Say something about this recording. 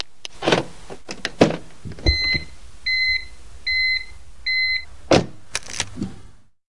This is BMW320d. I opened the door with the remote key, after close it while the door alarm ringed. Then i put the key into the hole. it's recorded by two microphone.
key, stereo